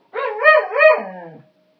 Dog Pitiful Whine
I recorded my dog barking after I hit a single note on my piano. Recorded using my ipad microphone, sorry for the lack of proper recording. I figured I would just nab it while he was feeling talkative!